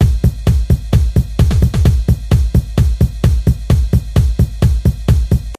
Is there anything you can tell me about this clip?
hip hop 20
sound song loop sample
beat, lied, song